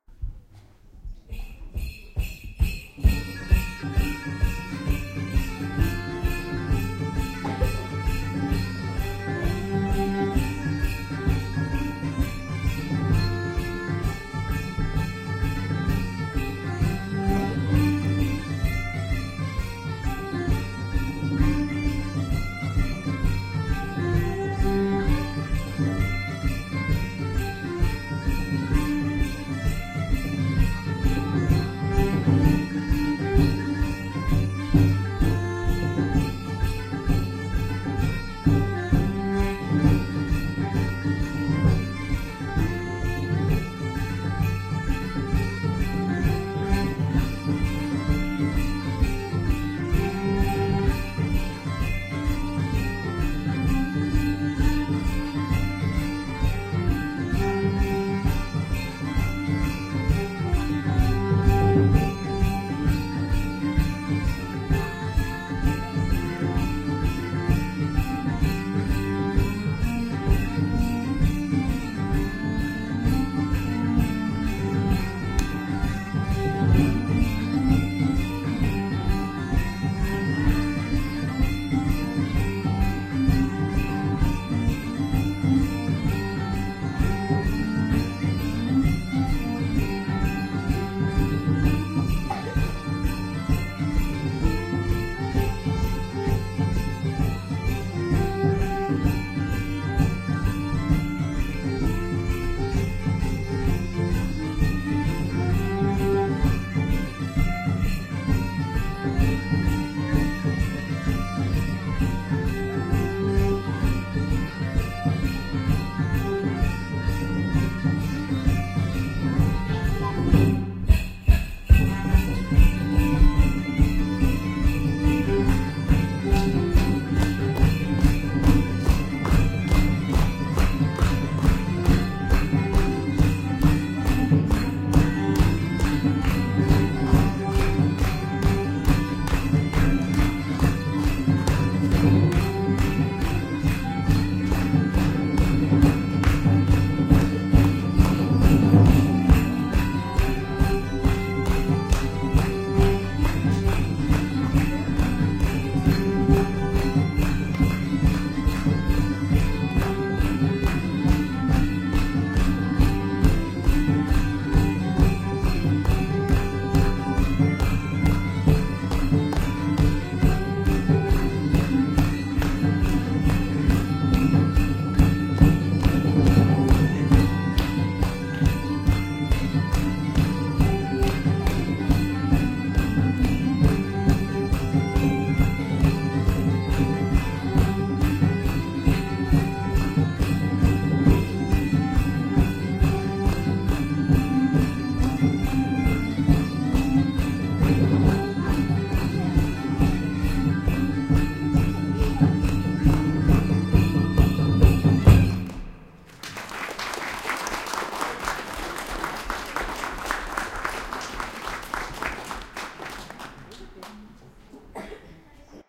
Cantiga 119 (Spain / 1200) and "Tant M'Abelis" (France / 1100)
This was recorded a fine Saturday in august, at the local viking market in Bork, Denmark. Three musicians played a little concert inside the viking church. Unfortunately i have no setlist, so i can't name the music.
Recorded with an Olympic LS-100 portable recorder, with internal mics.
Please enjoy!